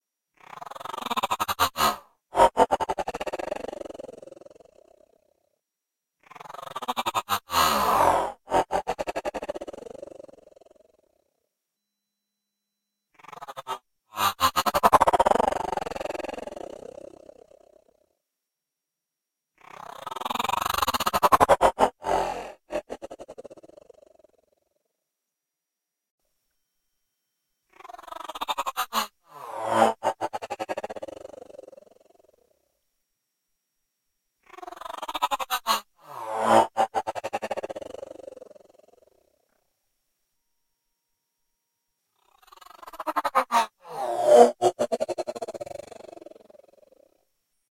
crazy seal pass<CsG>
granular passby. Created using Alchemy synth
scifi, effect, sounddesign, sound-design, whoosh, sci-fi, digital